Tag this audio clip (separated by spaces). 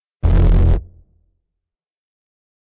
communication signal